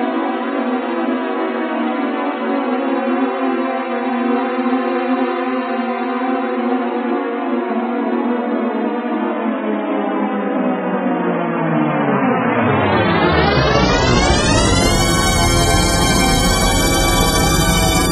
thx test 1
I first had a look at the original THX sound and tried to reproduce what I saw. The final chorus sounds bad because it's hand-drawn, so the right notes aren't hit plus the lines aren't straight so the pitches keep moving around a bit.
doodle, hand-drawn